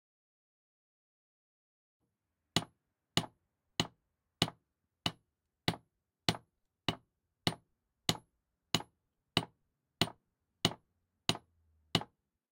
Hammer, metal
Bashing on metal with hammer continuously. Made in a small workshop. The sound was recorded in 2019 on Edirol R-44 with Rode NTG-2. Adobe Audition was used for postproduction.